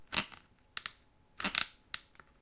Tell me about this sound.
This is from a library of sounds I call "PET Sounds", after the plastic material PET that's mainly used for water bottles. This library contains various sounds/loops created by using waste plastic in an attempt to give this noxious material at least some useful purpose by acoustically "upcycling" it.
ecology, plastic